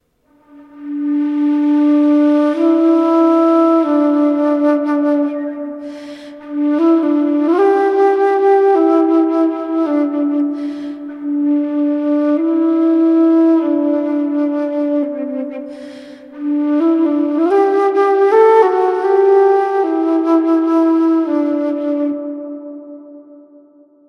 flute clip
Clip from a song I made a decade ago ("cruelty is in us").
Daw: Adobe Audition, Mic: Behringer ultravoice, interface: m-audio fast track
Recorded with my Yamaha flute.